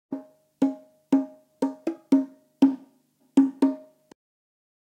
JV bongo loops for ya 1!
Recorded with various dynamic mic (mostly 421 and sm58 with no head basket)
samples, tribal, Unorthodox, loops, bongo, congatronics